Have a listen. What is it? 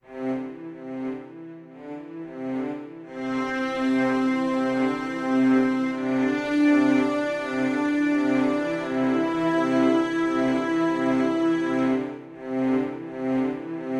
string with main theme